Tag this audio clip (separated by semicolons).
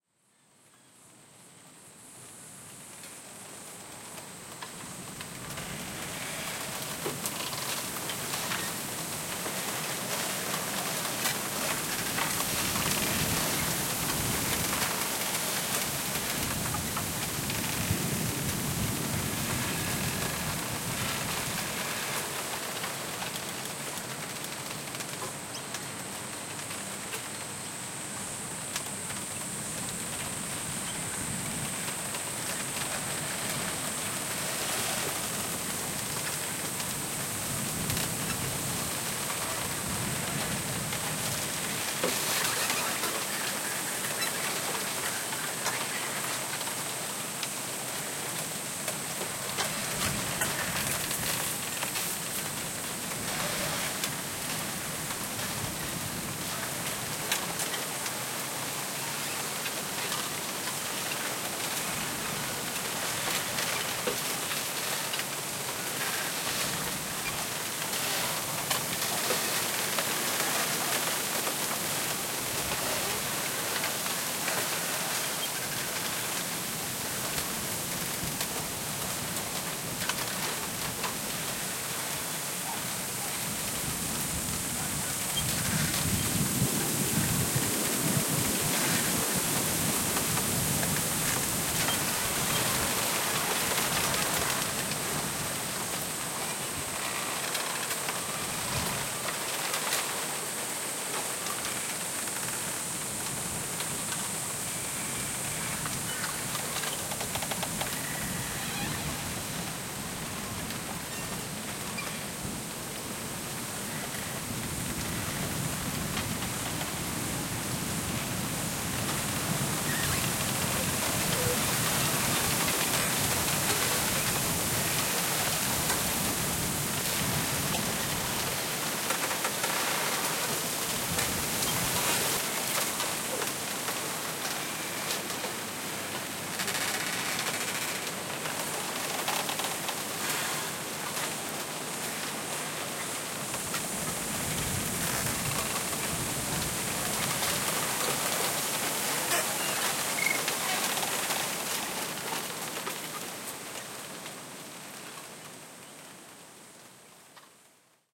bamboo; creaking; field-recording; forest; nature; squeaking; tree; trees; wind; wood; wooden